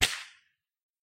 Cap Gun Bang
A small cap explosion recorded outside and cleaned up and edited with Audacity. Recorded with my phone (Samsung Galaxy J2 Pro).
bang, cap, field-recording, gun